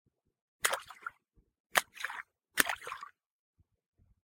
I tossed a branch into a stream repeatedly for some nice, natural water splash sounds.